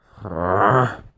angry man grunting
voice, anger, grunt